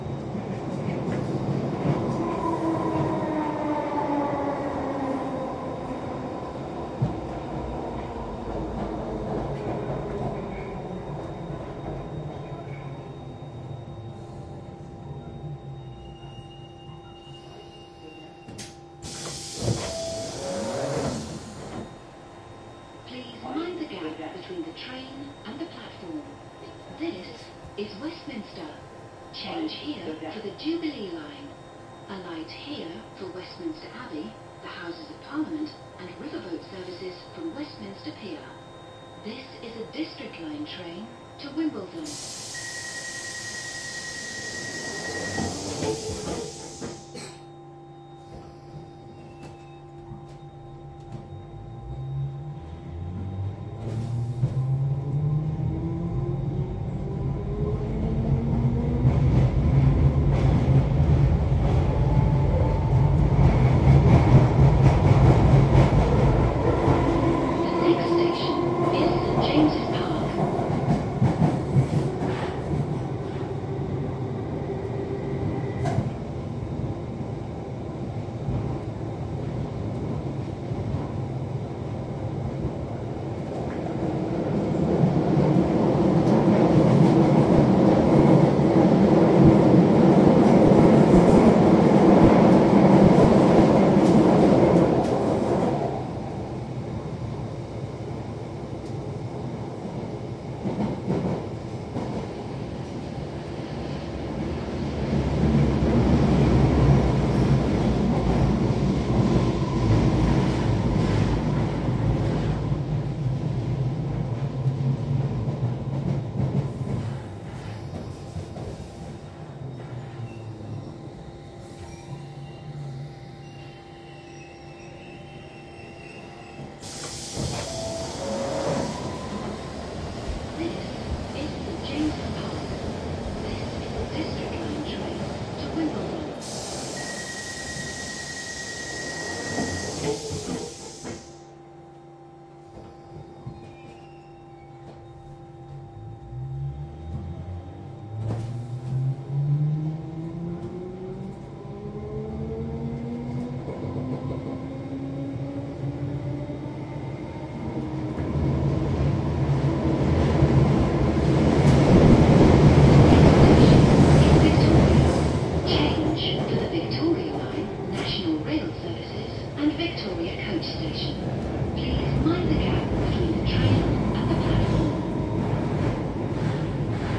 4ch surround recording of the interior of an empty London Underground District Line train to Wimbledon. Recording was conducted between the stops Westminster and Victoria. The recording features train action, doors opening and closing, announcements and (virtually) no passenger noises. Perfect as a backdrop.
Recording was conducted with a Zoom H2, these are the REAR channels of a 4ch surround recording, mics set to 120° dispersion.